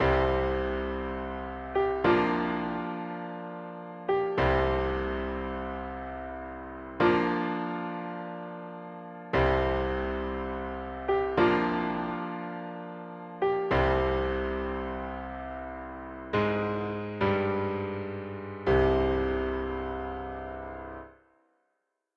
Created a simple little melody of sampled piano notes with my music production software.